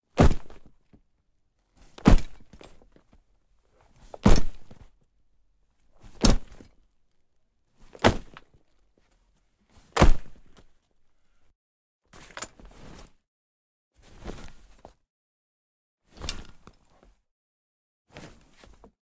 Heavy bag drop
Studio recording of a heavy sack falling on concrete with some random objects inside.
GEAR:
Oktava MK-12
Orion Antelope
Format:
backpack, bag, drop, fabric, fall, falling, foley, heavy, hit, impact, metal, object, potato, random, sack, trhow